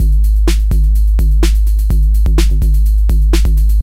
2 breaks, produced in Jeskola Buzz with individual percussive hits, back to back at 126 bpm.